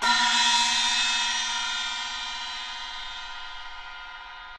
Recording of a single stroke played on the instrument Danao, a type of cymbals used in Beijing Opera percussion ensembles. Played by Ying Wan of the London Jing Kun Opera Association. Recorded by Mi Tian at the Centre for Digital Music, Queen Mary University of London, UK in September 2013 using an AKG C414 microphone under studio conditions. This example is a part of the "naobo" class of the training dataset used in [1].
qmul, idiophone, peking-opera, danao-instrument, chinese, cymbals, icassp2014-dataset, compmusic, china, chinese-traditional, percussion, beijing-opera